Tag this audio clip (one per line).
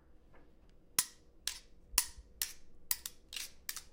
percussion
metallic
impact
spoons
hit
metal
clash
clang
clack
clanking
fight